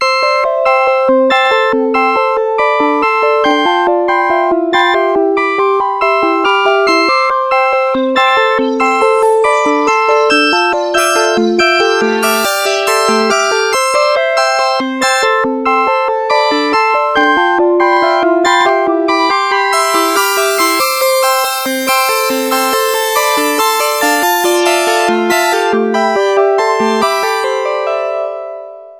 Nord keys 1 bar 70 Double Time
Nord Lead 2 - 2nd Dump
backdrop, melody, resonant, ambient, bass, bleep, blip, glitch, dirty, tonal, background, soundscape, nord, idm, electro, rythm